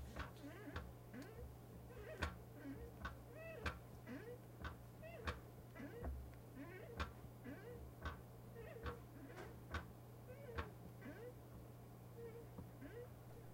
Lazy Boy Squick
Quickly getting out lazy boy chair, with a squick.
quick, lazy, squick, standing, boy, chair, man, male, getting, up